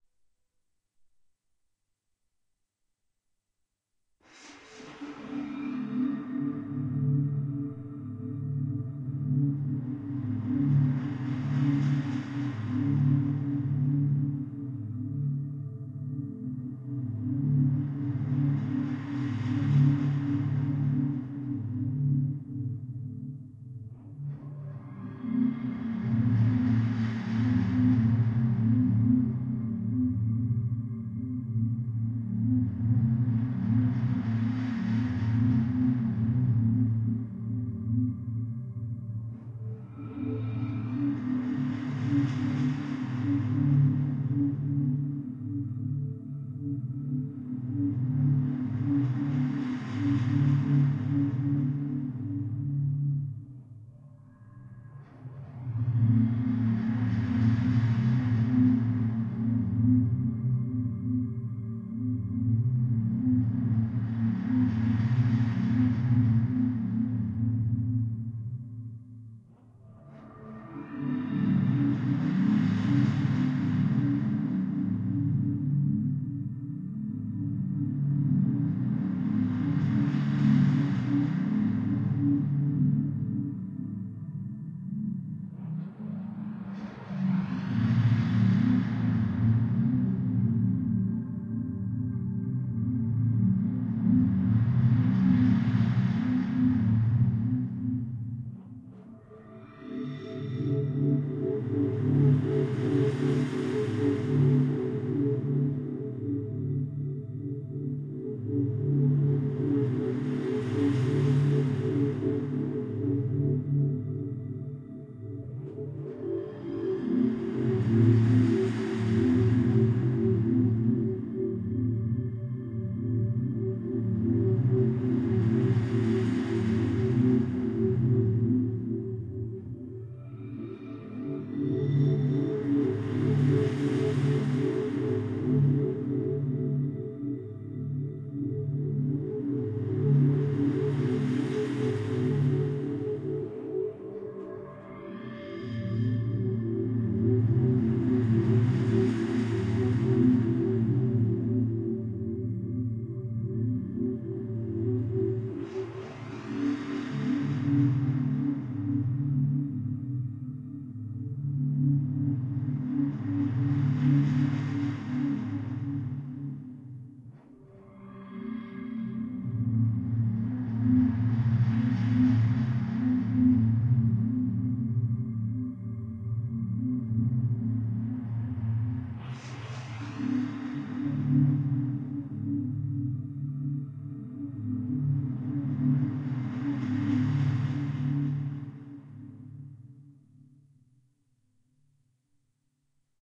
relaxation music #25
Relaxation Music for multiple purposes created by using a synthesizer and recorded with Magix studio.